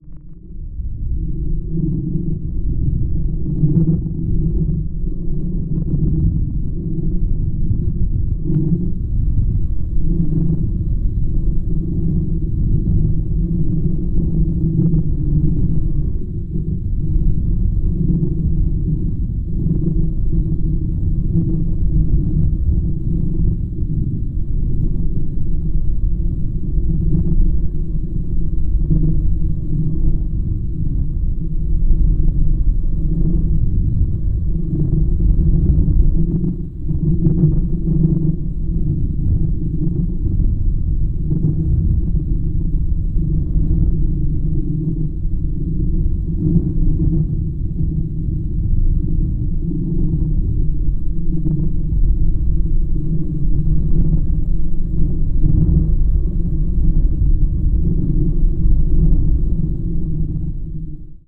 Distant bombing
I created this sound by a series of burp.
bombing, distant, explosion